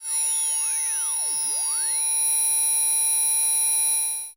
Scanning sound
Sounds like a machine scanning something.
Created using Chiptone
8-bit, arcade, chippy, chiptune, decimated, game, lo-fi, noise, page, pinball, radio, retro, scan, scanner